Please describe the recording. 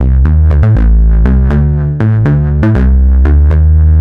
DeepBassloop5 LC 120bpm
Electronic Bass loop